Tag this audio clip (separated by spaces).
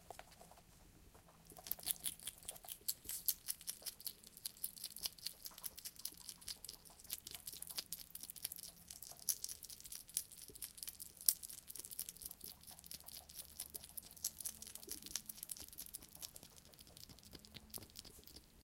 small-animal chewing munching hedgehog eating